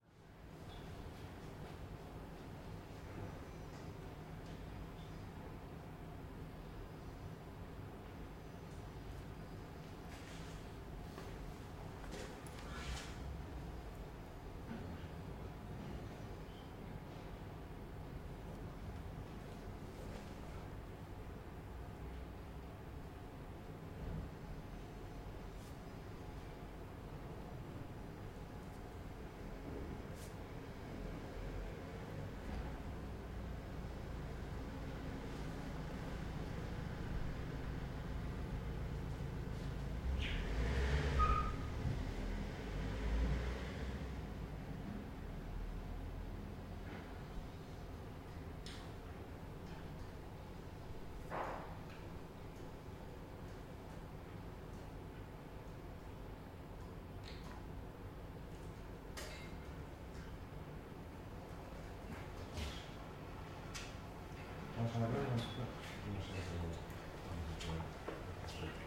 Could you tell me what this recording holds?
Roomtone Bar 2
Roomtone inside a closed bar
bar, inside, Roomtone, silence